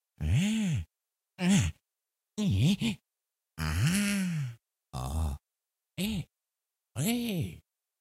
Small Smurf Noises
You roamed a forest and suddenly meet a little man who likes to make random noises. A classic, right?
little, pitch, rpg, small, smurf, voice